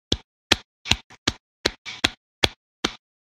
This sound could be some shambling animal like a penguin. Enjoy.
toddle, paddle, wauchle